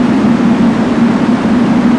Analogue white noise BP filtered, center around 230Hz
Doepfer A-118 White Noise through an A-108 VCF8 using the band-pass out.
Audio level: 4.5
Emphasis/Resonance: 9
Frequency: around 230Hz
Recorded using a RME Babyface and Cubase 6.5.
I tried to cut seemless loops.
It's always nice to hear what projects you use these sounds for.